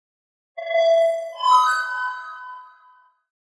BLUE-BRIGHT-BLUE-SPARK-ARP
Tonal, highlighting effects.